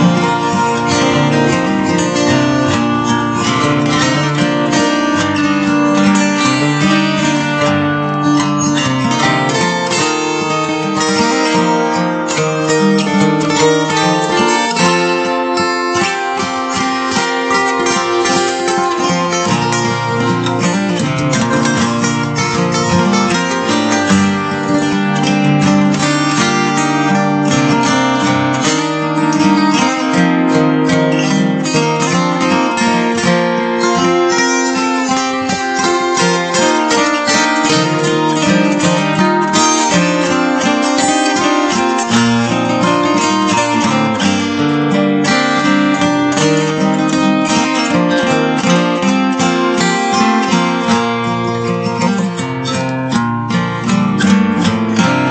Acoustic Guitar B flat Loop
guitar, A, Instrument, loop, backing, rhythm, minor, traxis, strum, Acoustic